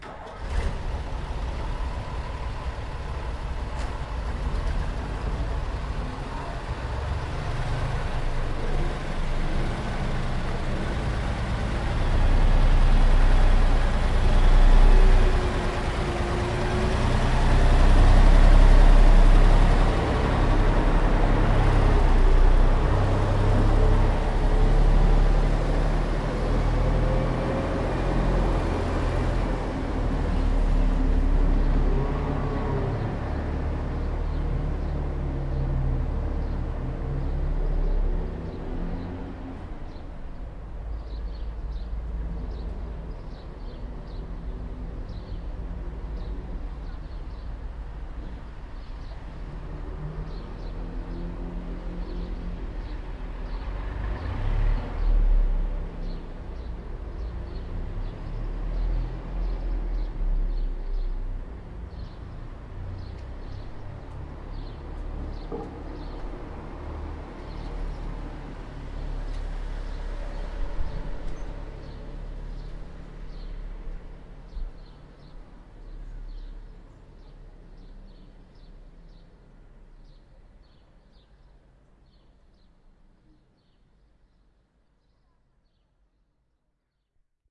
An old truck in Priboj, recorded 27/6 - 2016